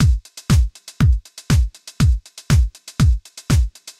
A collection of sounds created with Electribe MX1 in Vemberaudio Shortcircuit, some processing to get Toms & Hats, and a master multiband limiter to avoid peaks.
Col.leció de sons creats amb una Electribe MX1 samplejats i mapejats en Vemberaudio Shortcircuit, on han sigut processats per obtenir Toms, Hats i altres sons que no caben dins dels 9. Per evitar pics de nivell s'ha aplicat un compressor multibanda suau i s'ha afegit una lleugera reverb (Jb Omniverb) per suavitzar altres sons.
Enjoy these sounds and please tell me if you like them.
Disfrutad usando éstos sonidos, si os gustan me gustará saberlo.
Disfruteu fent servir aquests sons, si us agraden m'agradarà saber-ho.